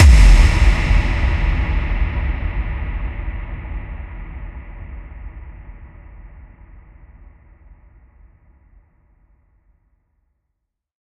Deep Impact
Sample from my latest free sample pack. Contains over 420 techno samples. Usefull for any style of electronic music: House, EDM, Techno, Trance, Electro...
YOU CAN: Use this sound or your music, videos or anywhere you want without crediting me and monetize your work.
YOU CAN'T: Sell them in any way shape or form.